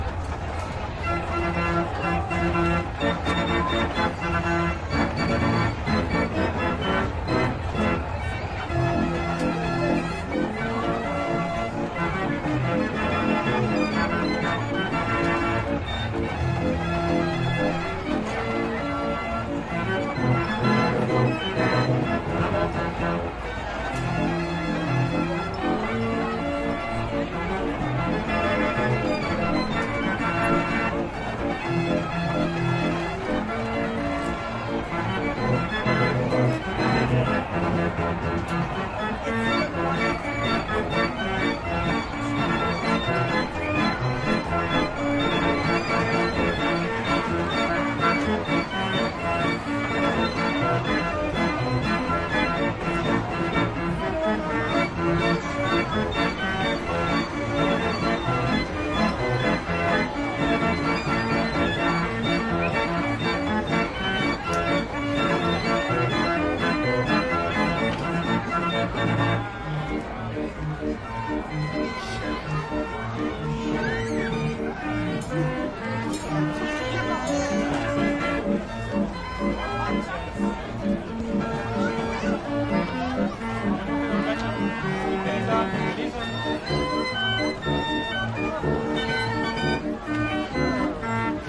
recording of a carousel and it's environment